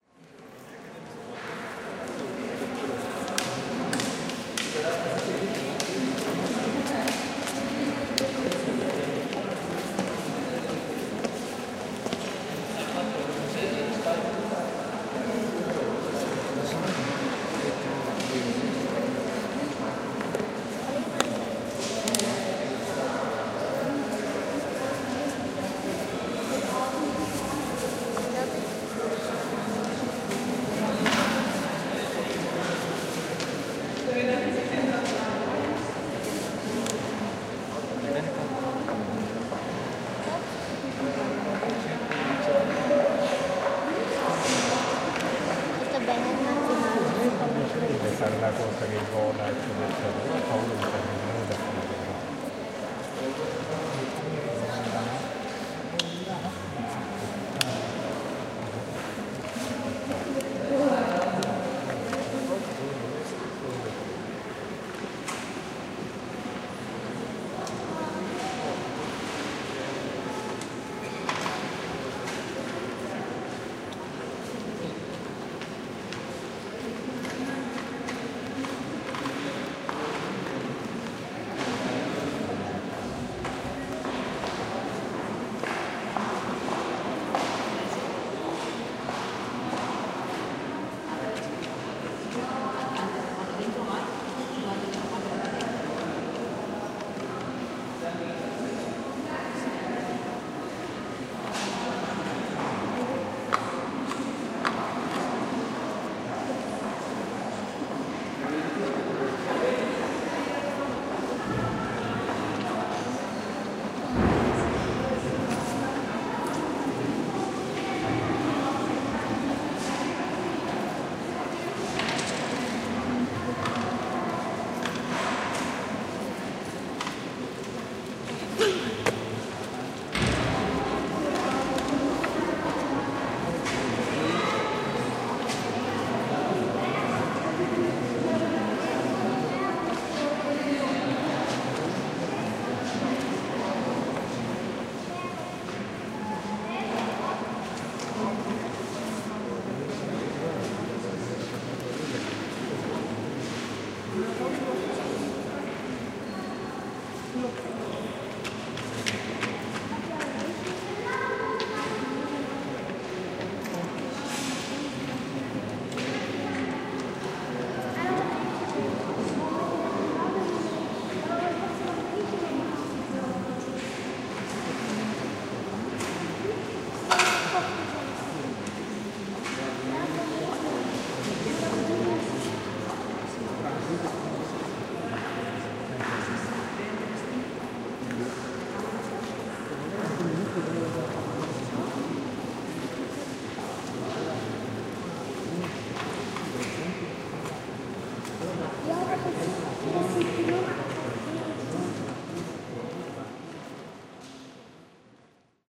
21 08 08-15 00-Museo de Arte comtemporaneo
We’re at the entry hall of the Museum. A very spectacular room, very wide and plenty of people. We hear voices of people talking, almost dissolved by the very much reverberated murmurs of the rest of the crew at the hall. When not talking, the sounds of the visitors walking fulfill the soundscape. There’s some kind of religious solemnity at the place: no louder voices could be heard, no irregular walks, not even a hit breaking the harmony… Nothing. Everything is perfectly combined at the sound side of the experience, so, art and beauty, could also be heard.